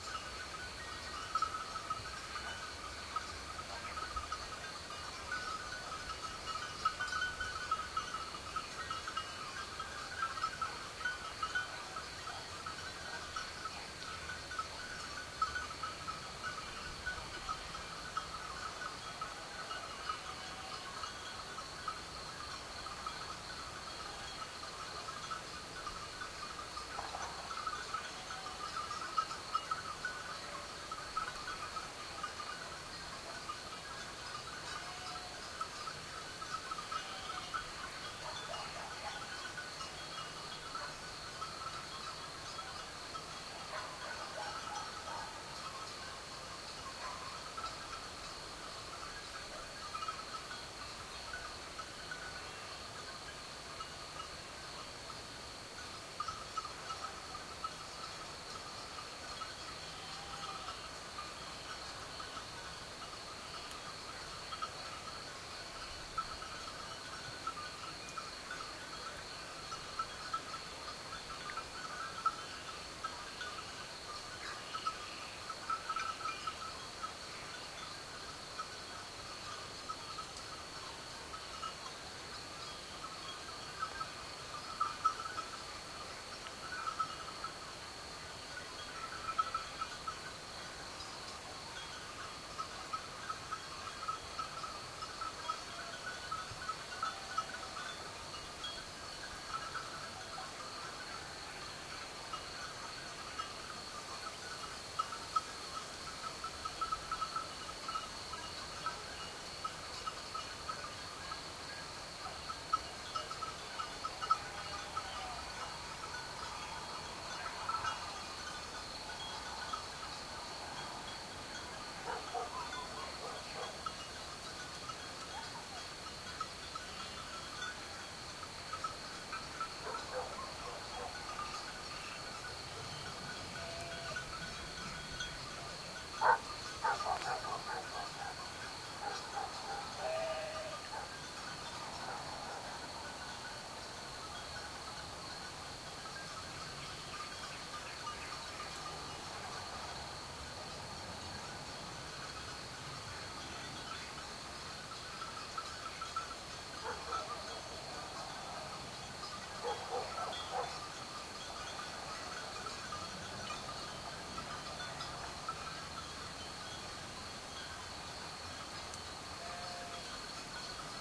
sheep bells tinkling distant evening country rural +distant cars and wind through trees Mallorca, Spain
bells, sheep, tinkling